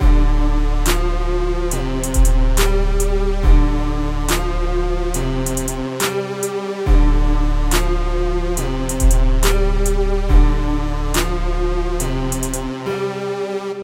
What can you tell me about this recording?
-Hip-Hop Loop- {Confessions Loop} 2014
I haven't put up a loop in a bit. Thanks for listening, and enjoy!
Twitter (New!)
12/8/14 10:44PM
Loop, Hip-Hip, Dance, Confessions, Trap, 2014, Free, Music, New, House